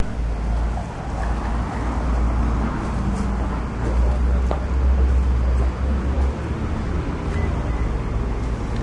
The sound of downtown.